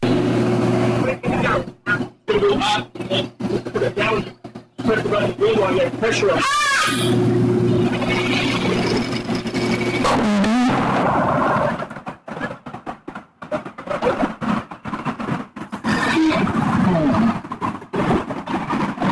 Damaged Ship's Recorder
I was trying to record something onto my computer of something I recorded with a mobile app and somehow it got horribly distorted and kind of choppy. It was some people going by on bikes. Not sure why the lady screamed but it really does sound like something went very wrong for a ship's crew.
It could be useful for productions that require a damaged and chaotic flight recorder sound effect.
bang, black-box, boat, chaos, chaotic, collision, craft, crash, crew, damaged, explosion, flight-recorder, metal, plane, ship, space, vehicle